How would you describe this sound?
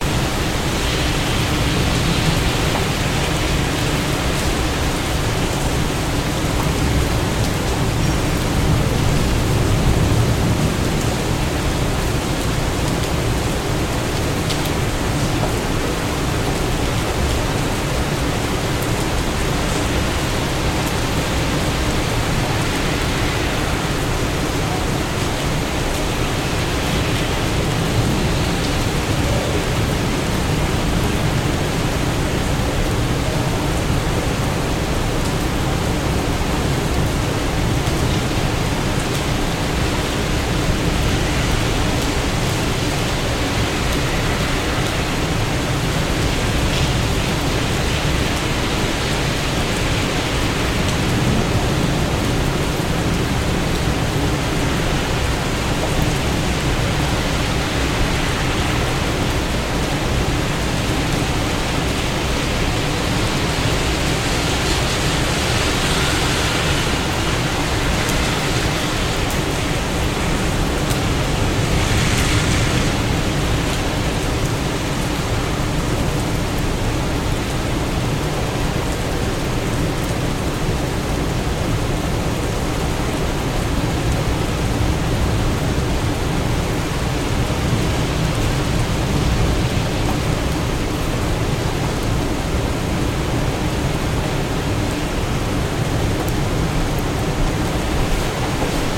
Rainy Toronto
This is just recorded from my window, during a short rainstorm on June 2nd 2020.
toronto, traffic, city, horns, rain, street, cars, storm